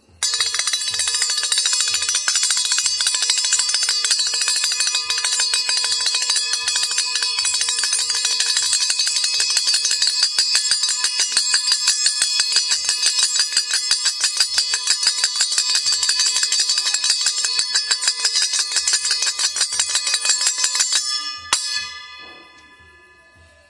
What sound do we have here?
It is called Kortal an Indian musical instrument.